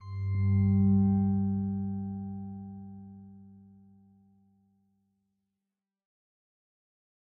Magical Glowing
Sound, Free, Glowing, Magical